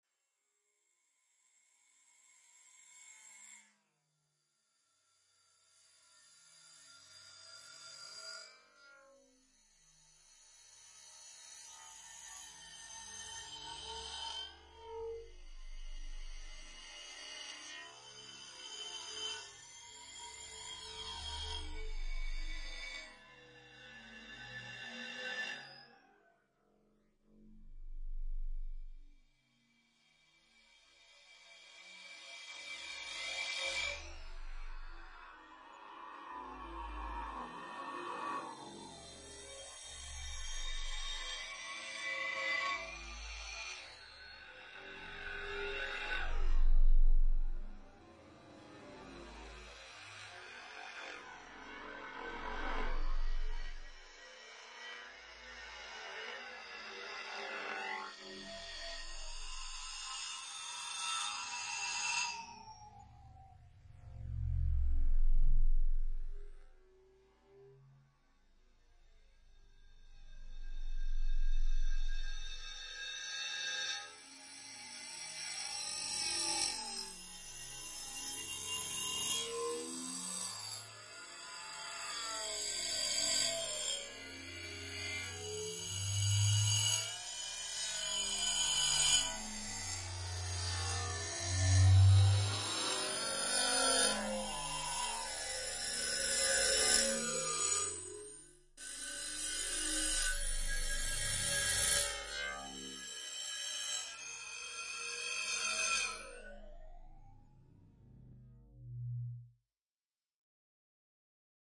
strange sound design, futuristic bassline (maybe). Third step of processing of the bunker bar sample in Ableton.